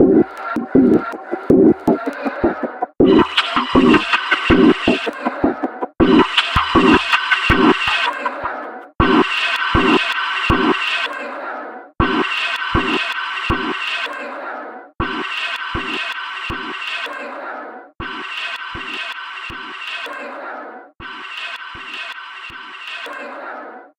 Since I really liked his description I had to pay him an honour by remixing this samples. I cutted up his sample, pitched some parts up and/or down, and mangled it using the really very nice VST plugin AnarchyRhythms.v2. Mastering was done within Wavelab using some EQ and multiband compression from my TC Powercore Firewire. This loop is loop 3 of 9.